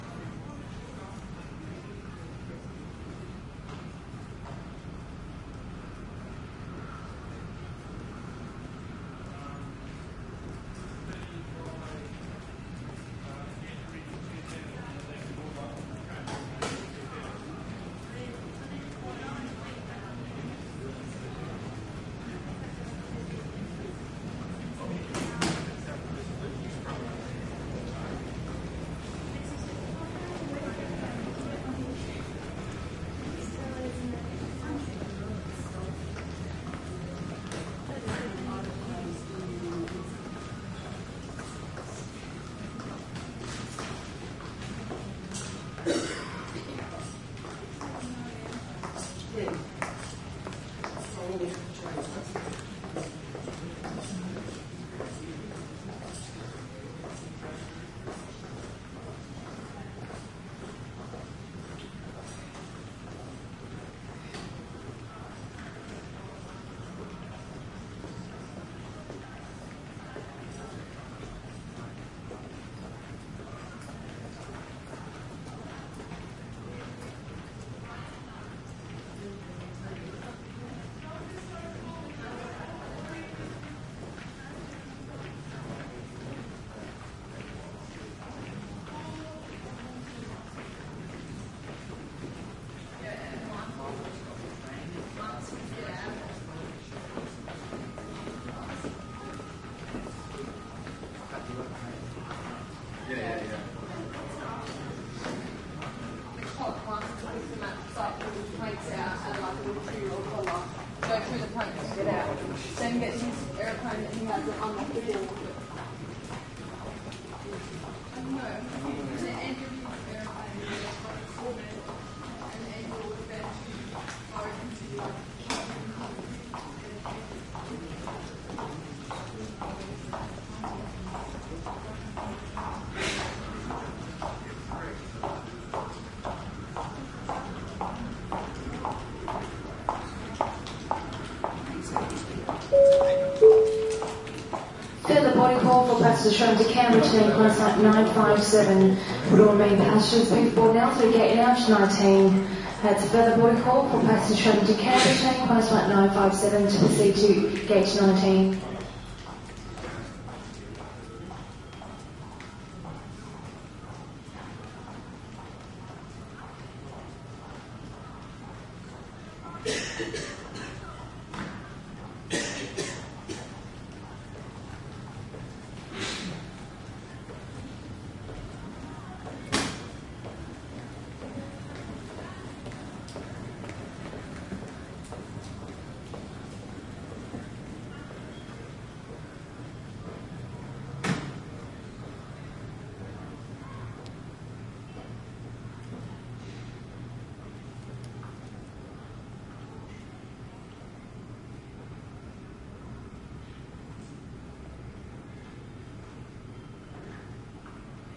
Airport Passage Brisbane 5 FootSteps
Ambient sounds of people passing on a hard floor in a long passage between a terminal and the main airport lobby. Recording chain: Panasonic WM61-A microphones - Edirol R09HR
airport, steps, crowd, walking, panasonic-wm61-a, foot, binaural, people